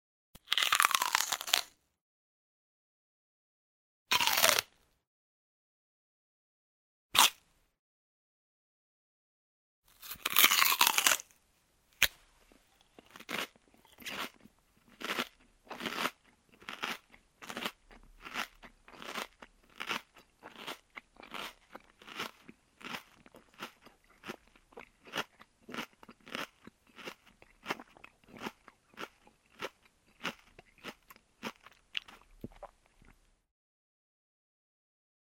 Eat an apple
Eating an apple. Really clean sounds.